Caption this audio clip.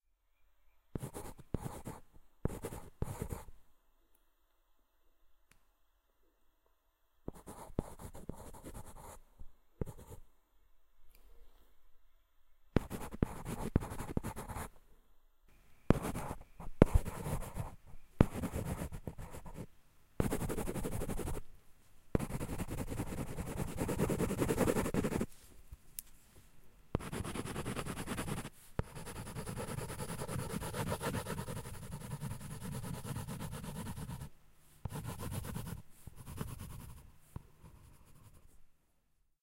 Pencil Scratch 2
A pencil scribbling and writing on cardboard.
scratch, cardboard, scribble, zoom-h4n, write, scratching, draw, pencil, scrawl, drawing, pen, writing, paper